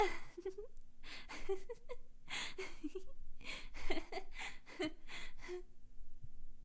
Girl Giggling Cutely
Me giggling like a little girl.
giggle, female, laughter, moe, voice, laugh, anime, cute, Girl, vocal